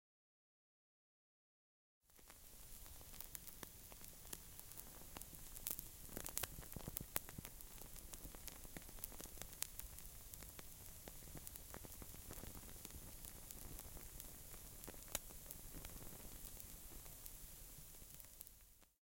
The sound of an ignited firelighter.
Czech, burning, Panska, CZ, firelighter, fire